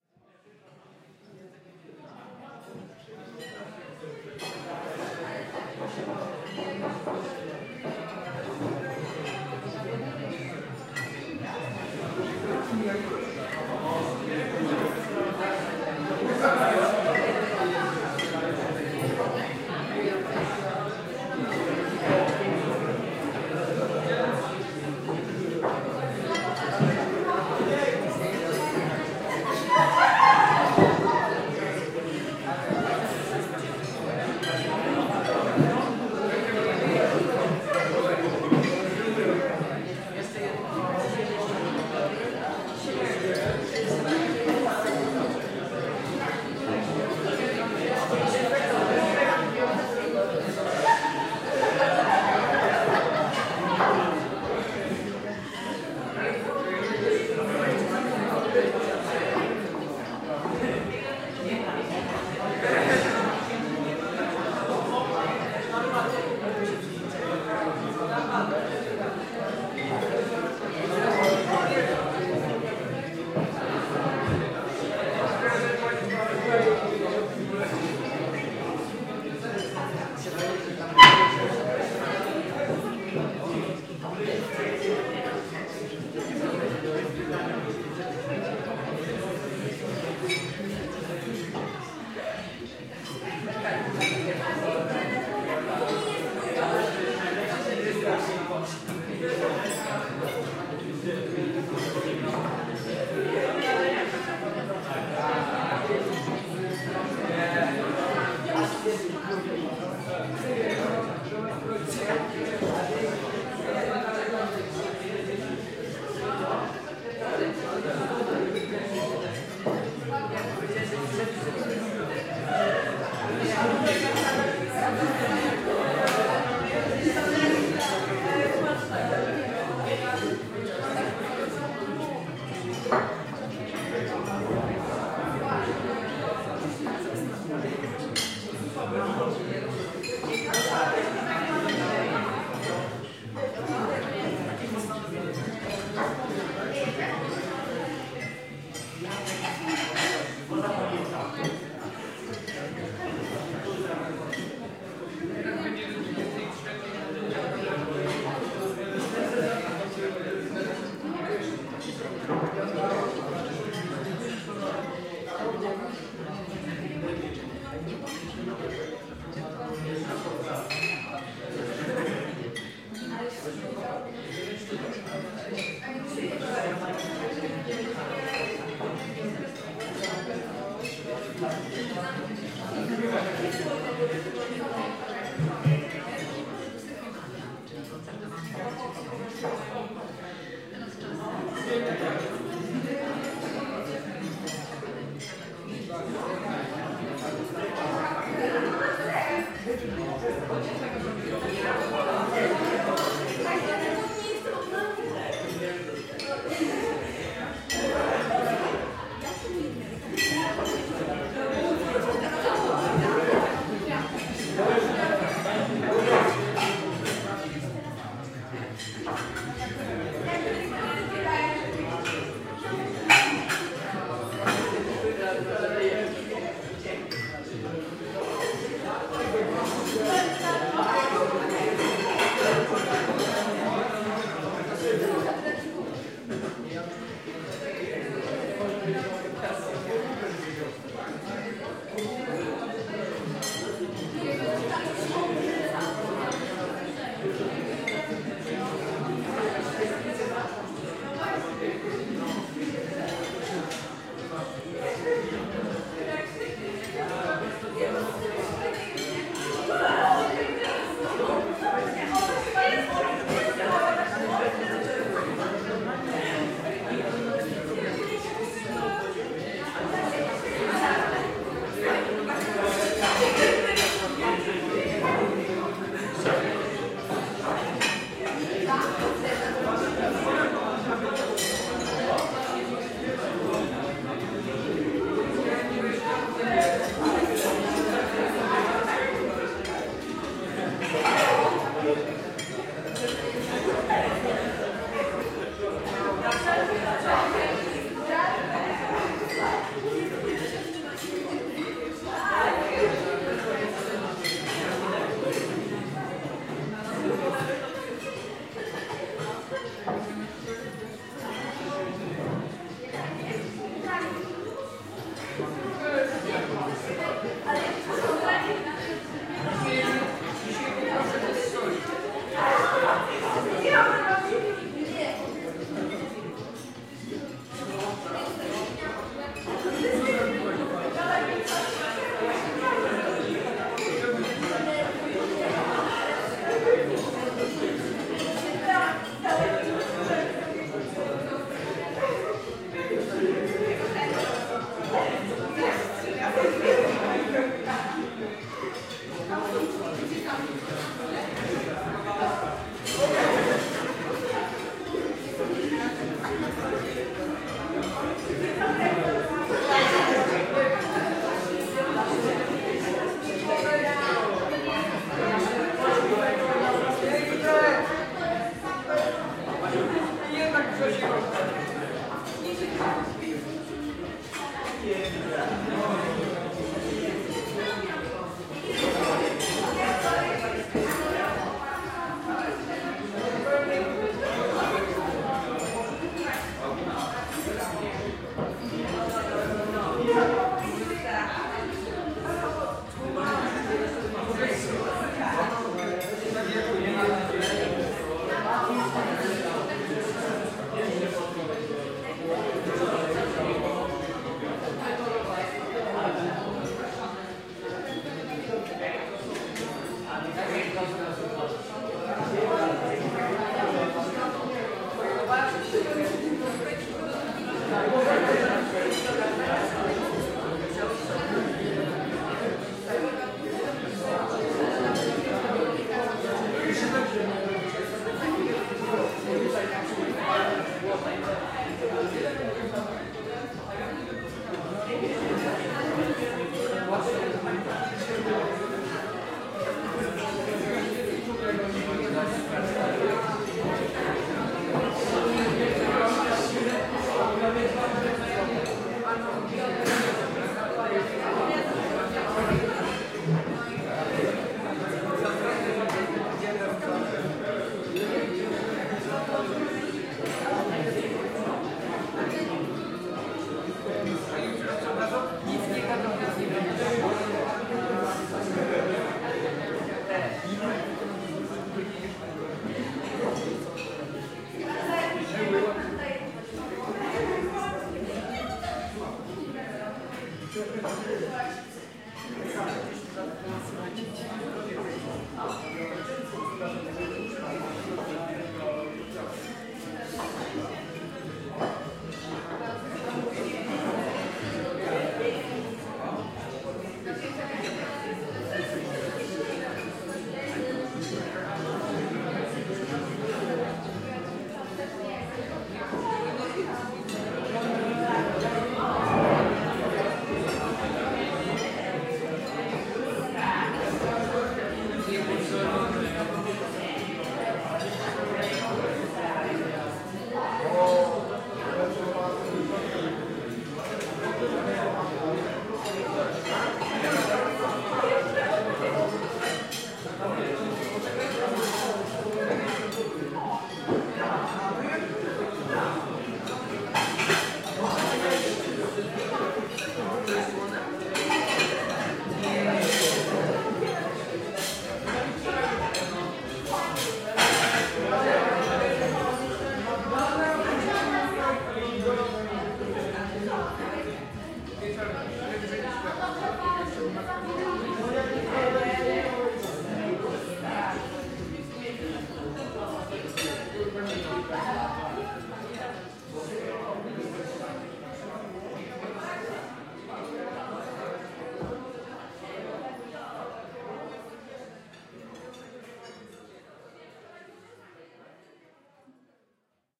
180510-group dinner Harenda
10.05.2018: field recording from restaurant Harenda located in Ludwikowice Kłodzkie (Lower Silesia in Poland).Gropu of youth eating dinner. No processing, recorder zoom h4n + internat kics
field-recording, tourists, eating, fieldrecording, restaurant, food